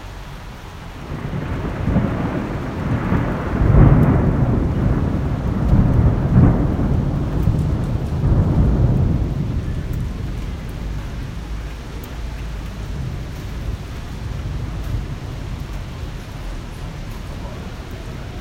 rain thunder 05
Just deposited my mobile phone on the window during the last thunder-storm. Here's the result.
rain, thunder, weather, thunder-storm